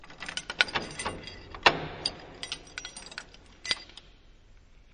Clattering Keys 01 processed 01
clattering, motion, rattling, shaking